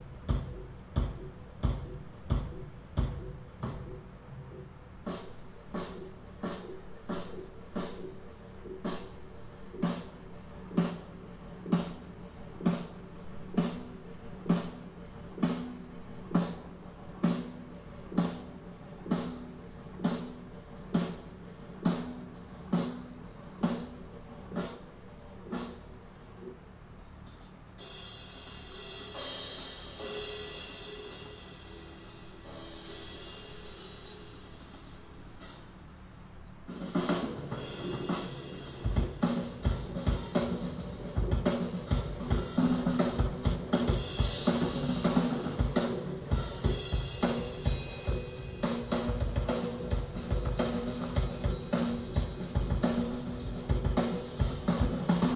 Outdoor event - band tuning up at Myrtle Park (sound of generator heard)
a band tuning up at an outdoor event. There's the sound of generators that were used for the lighting
band, music